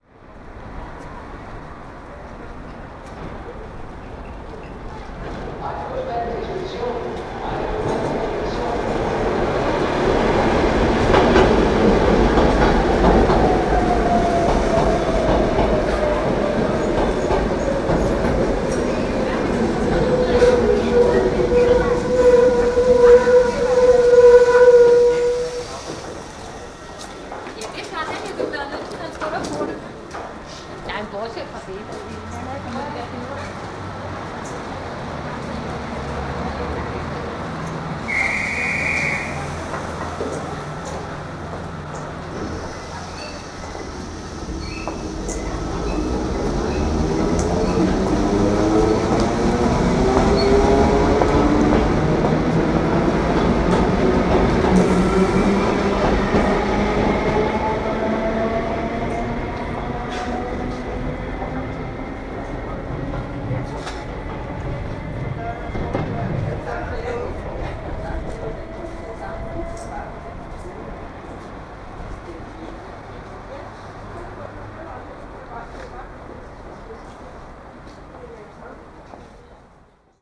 06-Osterport Platform S-Train Arrival Departure
Osterport Station in Copenhagen. Recorded in mono on 31st November 1980 on a Tandberg tape recorder at 3 3/4 ips with a dynamic microphone. Ambient sound on the open platform with persons waiting and an S-train arrives, and stops at the platform. Later the whistle blows and the S-train departs.
train-departure, platform, ambience